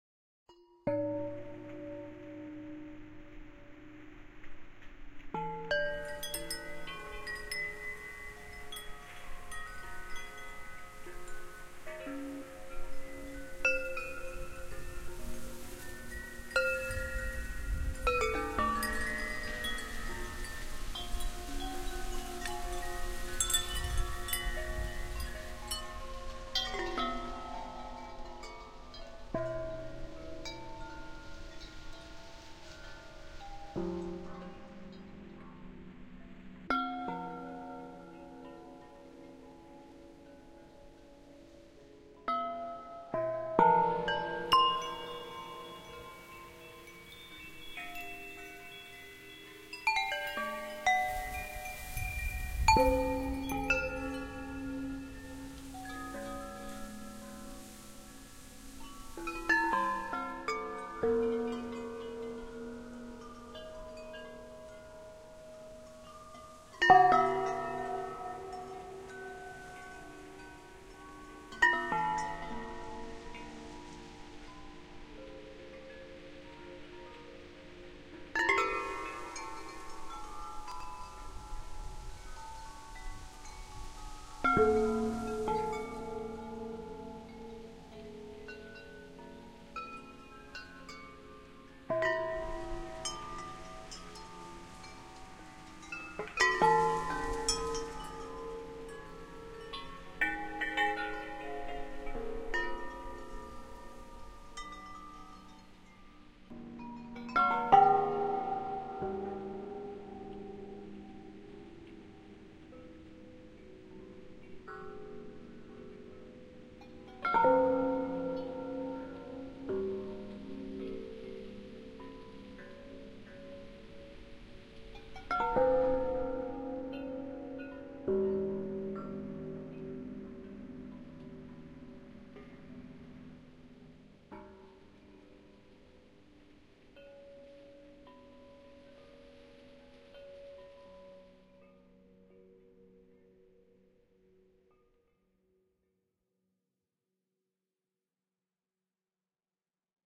Recorded live to disk utilizing many samples of a glass marimba and metal windchimes, subsequently edited and expanded in BIAS Peak.